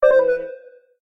This is a short notification, originally intended to counteroffer a trade to other players in an online game. Created in GarageBand and edited in Audacity.